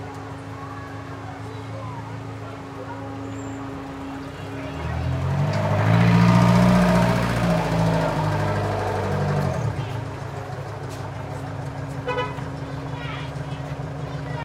truck or throaty car auto real big slow rev pass by from offmic Gaza 2016
auto, big, car, pass, rev, slow, throaty, truck